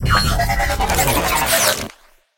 A wee transformer sound
design, robotic, metal, factory, industrial, robot, mechanical, machine, sound, machinery, transformer